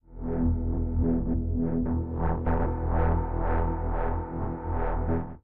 004 sub wobble 110-440HZ
Here is a sub bass sample generated in SC